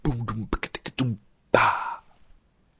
Beat Break 01
beat, beatbox, dare-19